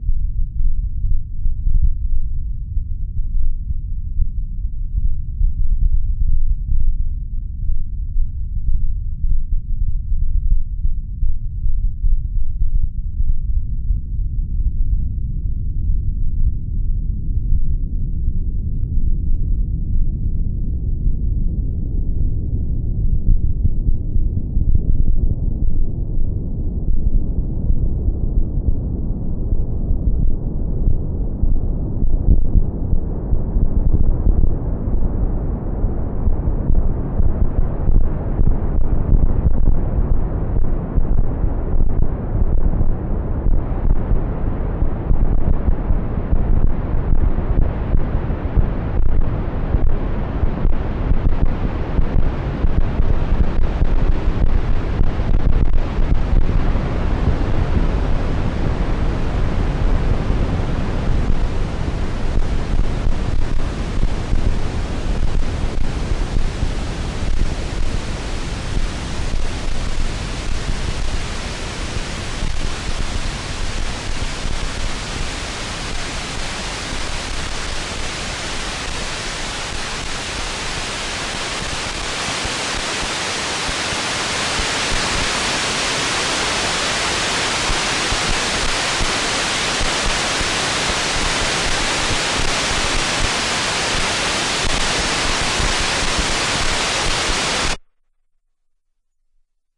ARP 2600 Noise Filter
Samples recorded from an ARP 2600 synth.
More Infos: